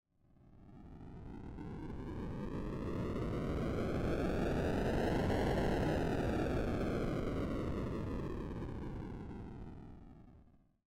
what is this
Pixel Sound Effect #6
Another sound effect. It kind of sounds like a storm. This sound is completely free, which means you can use this sound with out permission. If you use this on a project, please mention my name. Thanks!
effect, pixel, rain